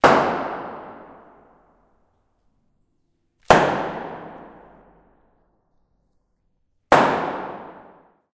bangs-echo-3x
Three times a plastic bag exploded in a big hall with natural echo.